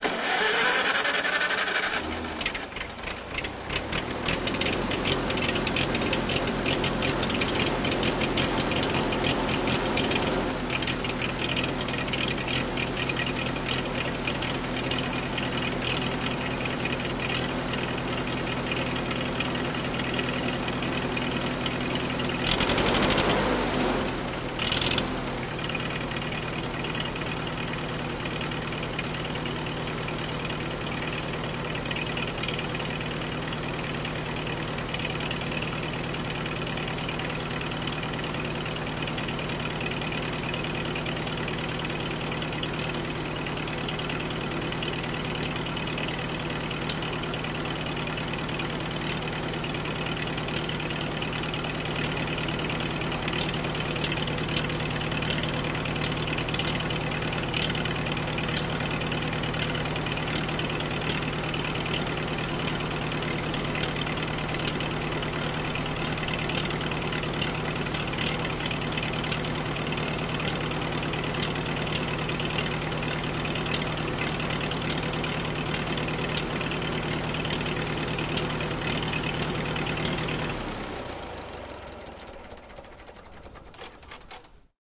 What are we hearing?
Old Tractor Starting and Engine Noises
Antique American tractor starting and engine noises. Recorded in Cullinan, South Africa. The owner was unaware of the model, only mentioned it's an American tractor that is over 40 years old.
Tractor, Vehicle, Start, Engine-noises, OWI